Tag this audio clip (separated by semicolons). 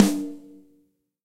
drum; real; room; space; stereo